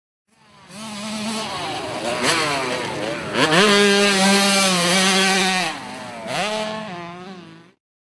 KTM65cc-in-turn
ktm65 turning on mx track
65cc, ktm, motorbike, motorcycle